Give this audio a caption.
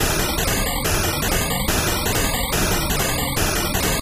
Sequences loops and melodic elements made with image synth.